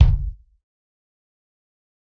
Dirty Tony's Kick Drum Mx 092
This is the Dirty Tony's Kick Drum. He recorded it at Johnny's studio, the only studio with a hole in the wall!
It has been recorded with four mics, and this is the mix of all!
dirty, drum, kick, kit, pack, punk, raw, realistic, tony, tonys